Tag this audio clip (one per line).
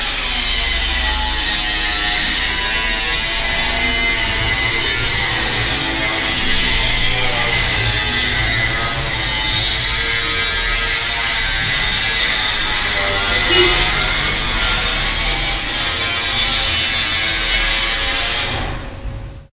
cutter
metal
construction
maschine